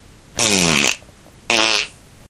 morning fart
aliens, car, explosion, fart, flatulation, flatulence, frogs, gas, noise, poot, race, space